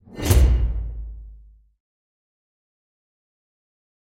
A sting / stab (or stinger) to use for an accent, transition, or FX. Good for horror, science fiction, etc.
GEAR: Pro Tools 10.3.9, Korg Triton, Concert Bass Drum
CREATED ON: April 26, 2015